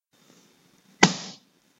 A book closing.